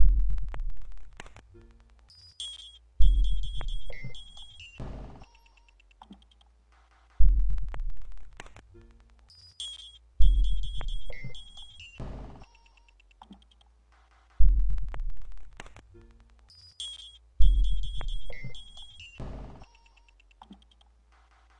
doggy glitch4
lowercase minimalism quiet sounds
lowercase, quiet, sounds, minimalism